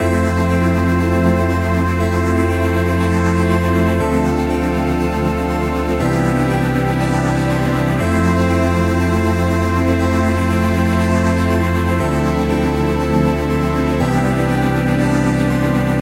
FunChords Disco by DSQT 120 bpm
Retro synth chords loop I made with no real purpose. Hope you'll give it one!
chords, Disco, funk, loop, retro, synth